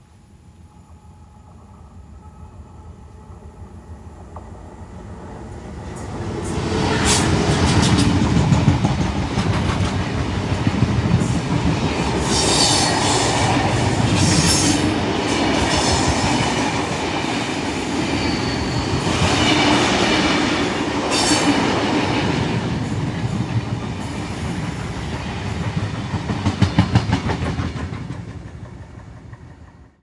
Train stereo 4824
Stereo recording of a train passing at night.
Sound-design, Stereo